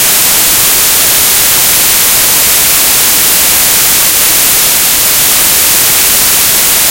Some static that occurred during a failed file conversion. It sounded similar to to TV/radio static so I decided to post it.
radio, static, tv, white